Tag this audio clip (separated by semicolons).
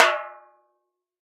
1-shot; tom; drum; velocity; multisample